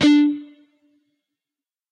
Dist sng D 4th str 12th frt pm
D (4th) string, on the 12th fret. Palm mute.
distortion, guitar, guitar-notes, distorted-guitar